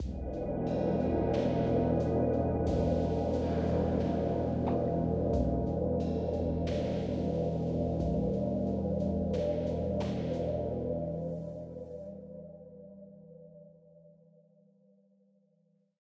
As an internship at the Utrecht School of the Arts, Adaptive Sound and Music for Games was investigated. For the use of adaptable non-linear music for games a toolkit was developed to administrate metadata of audio-fragments. In this metadata information was stored regarding some states (for example 'suspense', or 'relaxed' etc.) and possible successors.
The exit-time (go to next audio-file) is at 10666 ms
ambience, chill, dark, drone, drones, fragment, game, game-music, music, non-linear, non-linear-music, static, suspense, synthesized, synthesizer, underwater, water